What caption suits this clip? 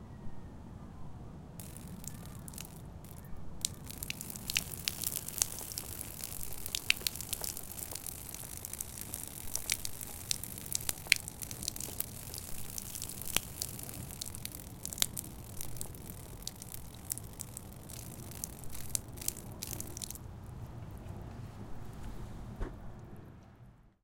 Water dripping. Recorded with Zoom H4